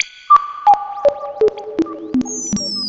sonokids-omni 04
abstract, analog, analogue, beep, bleep, cartoon, comedy, electro, electronic, filter, fun, funny, fx, game, happy-new-ears, lol, loop, moog, ridicule, sonokids-omni, sound-effect, soundesign, space, spaceship, synth, synthesizer, toy